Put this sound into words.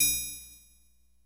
Nord Drum TRIANGLE 1
Nord Drum mono 16 bits TRIANGLE_1
Drum, Nord, TRIANGLE1